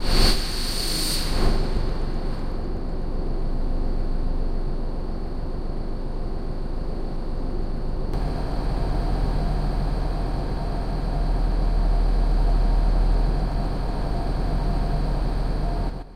A noisy granular pad.